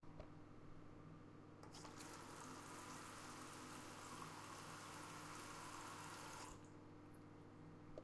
Here is the sound of a running tap in the bathroom.
water, bathroom, tap